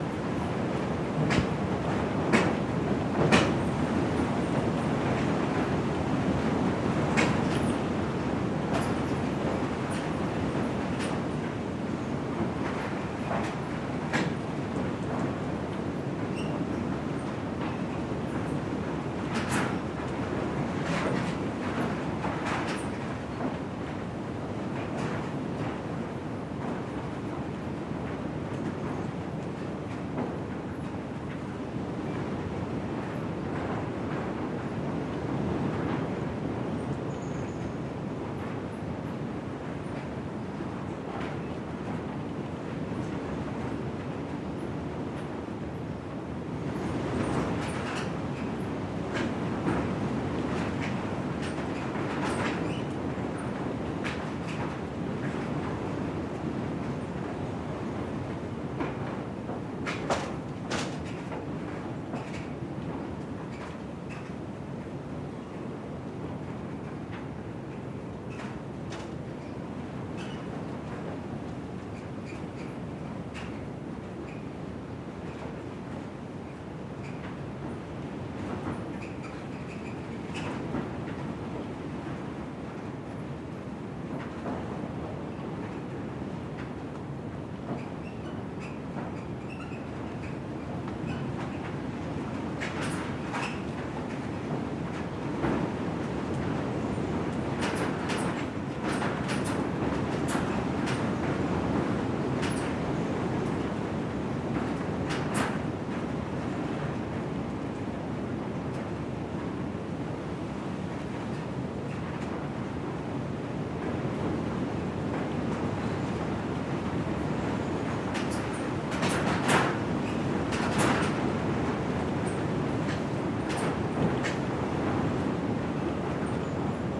Barn Wind 001
This is a recording in a run-down old barn during a heavy windstorm. Lots of rattling shingles, doors, etc.
Recorded with: Sound Devices 702T, Sanken CS-1e
barn, country, door, gate, gust, rattle, shake, storm, wind, wood